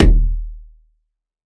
WATERKICK FOLEY - HARM LOW 02
Bass drum made of layering the sound of finger-punching the water in bathtub and the wall of the bathtub, enhanced with lower tone harmonic sub-bass.
bassdrum, foley, kick, percussion